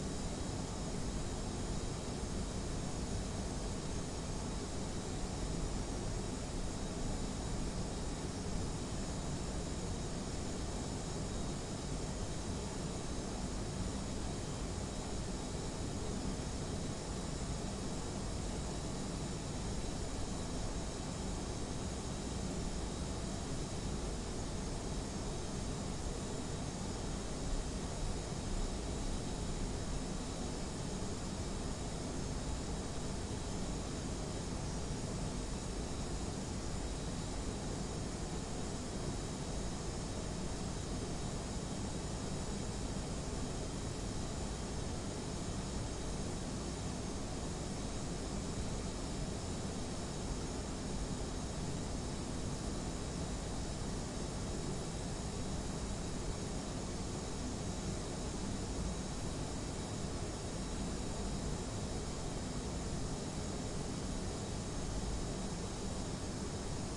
Utility room front
4ch-surround field recording of a technical utility room, featuring running computers, diverse machinery and a very noisy air-conditioning hub.
Very useful as a neutral backdrop for any kind of motion picture or radio play requiring an "techy" feel to the atmosphere, also good for science-fiction. The ambient noise of this room, I always think, is what being on the ISS must sound like.
Recorded with a Zoom H2, these are the FRONT channels, mics set to 90° dispersion.